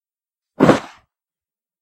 A thud sound
fight, punch, fall, thud